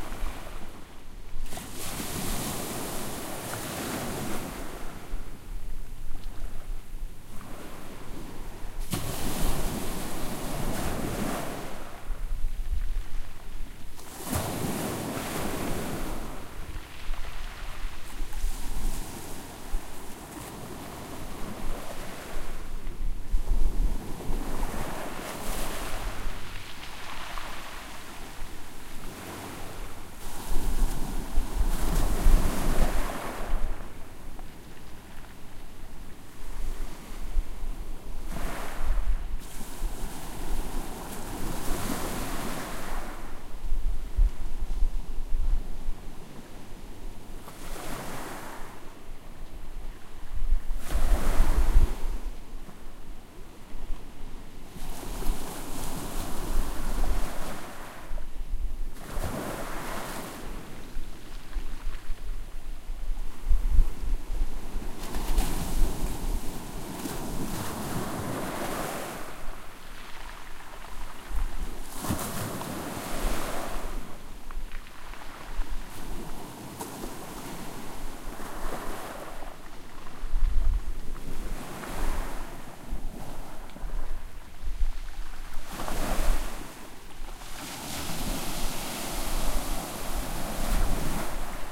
Tropical beach waves on pebbled shore
Waves washing up onto the pebbled shore in St. Croix.
coast, shore, seaside, wave, coastal, waves, sea, water, surf, beach